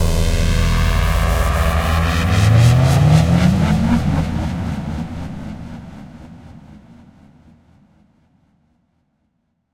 Cinematic Tension Build Up. action atmosphere daunting effect film flashback future granular movie murder night processed sci-fi shock shocked sound suspense tension
Album: Cinematic Sounds
daunting, movie, murder, noise, shocked